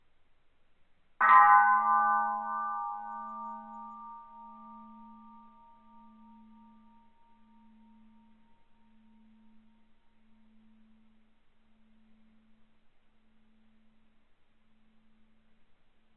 This version is 80% slower than the original. Edited in Audacity 1.3.5 beta